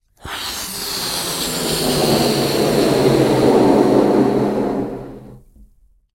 Balloon - Inflate 02
Fun with balloons :)
Recorded with a Beyerdynamic MC740 and a Zoom H6.